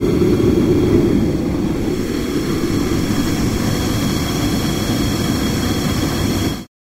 Fire Flame Burner
Hot air blower burning. Recorded with a Zoom H2.